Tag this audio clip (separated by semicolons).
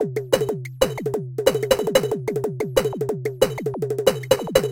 e; love; l; deathcore; t; k; pink; y; fuzzy; o; h; processed; glitchbreak